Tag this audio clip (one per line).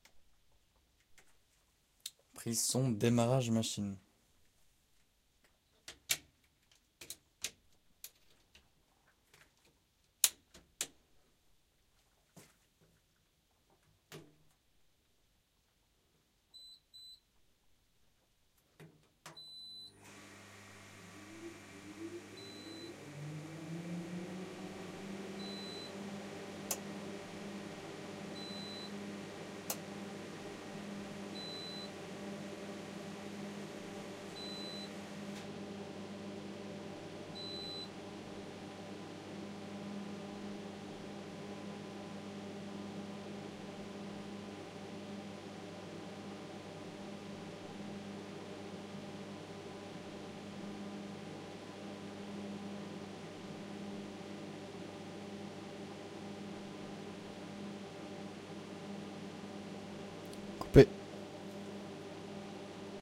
engine
Machine